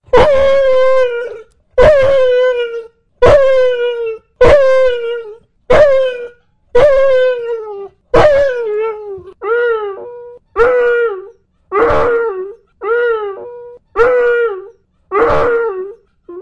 hanna-long
Basset hound complaining with an unusual howl. Easy to loop very annoying,
dog, field-recording